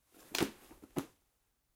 Body falling to floor 4
A body falling lightly to a wood floor, natural reverberation present.
body, collapse, collapses, drop, dropping, fall, falls, floor, ground, impact